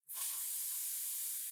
This sound can be used in conjunction with the steam loop in this pack to simulate a stream of steam being turned on.